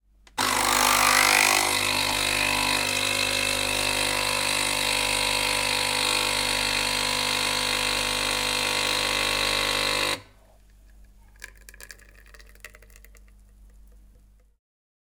Me recording a nespresso-type machine
cafe, machine, latte, coffee, maker, espresso, nespresso